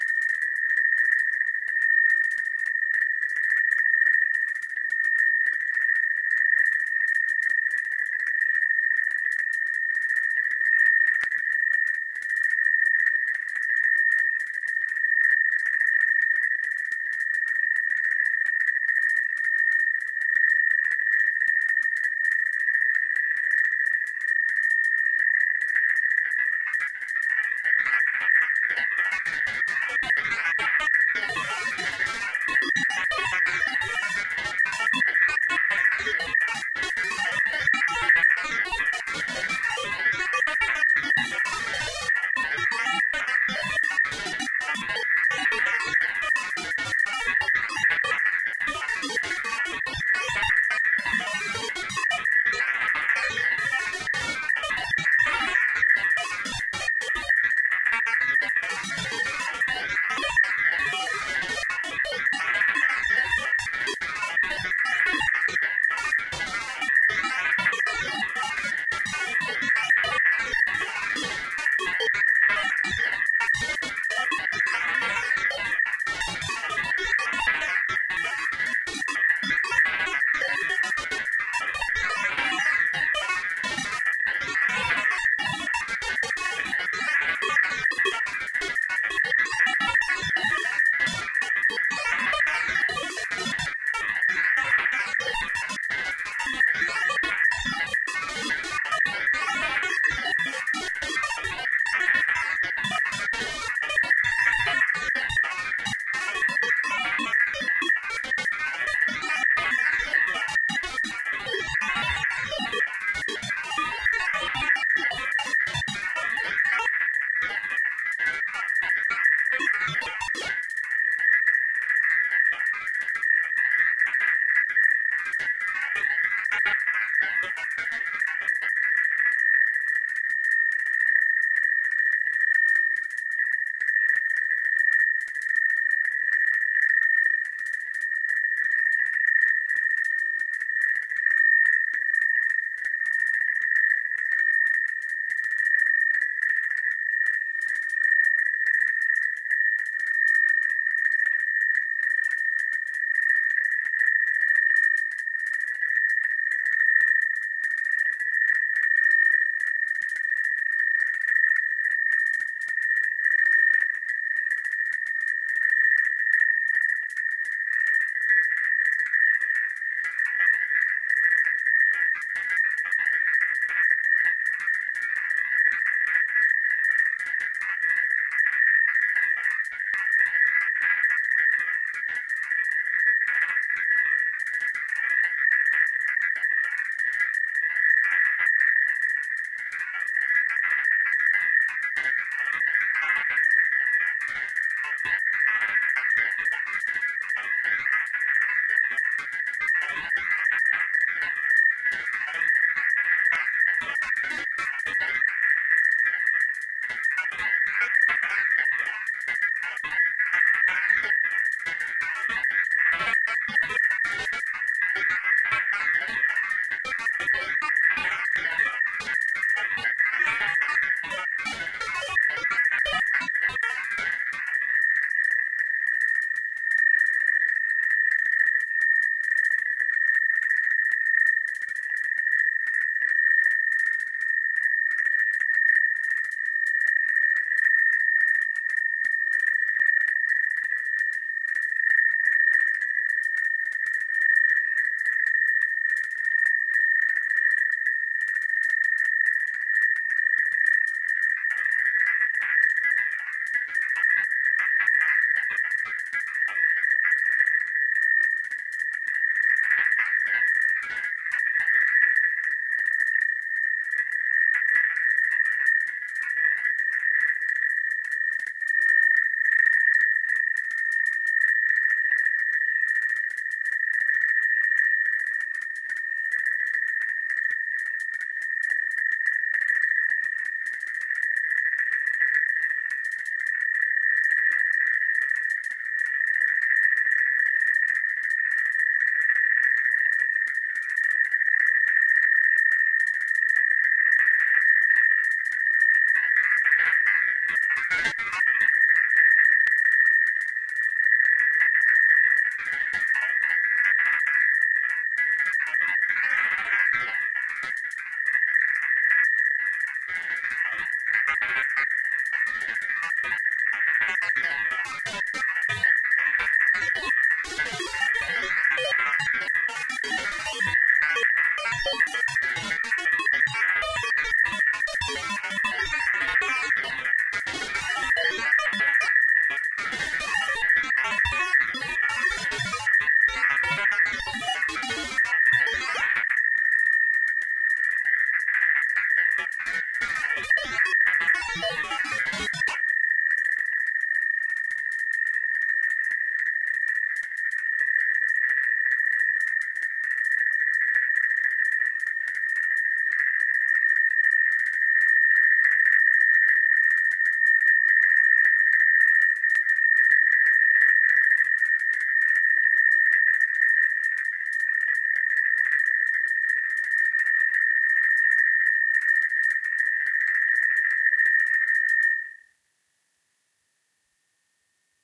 Glitched Oscillations 4
Random rhythmic session of oscillations, screaming and sort of granular feel. Created with Clavia Nord Modular.
[internal filename] 2010 - Faust 3.
digital, electricity, electronic, error, experimental, glitch, granular, modular, noise